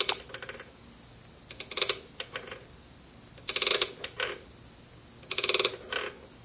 Frog Croak
A ring a metal was slid across ridges of a metal lamp.
croak, croaking, frogs, frog, pond